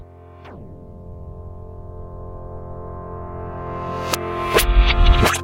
Resonating reverse string plucked.
A mix of synth sound and sting resonance reversed